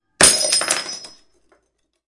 Glass Smash, Bottle, A
Raw audio of dropping a glass bottle on a tiled floor.
An example of how you might credit is by putting this in the description/credits:
The sound was recorded using a "H1 Zoom V2 recorder" on 19th April 2016.
bottle, break, breaking, crash, glass, shatter, smash, smashing, tile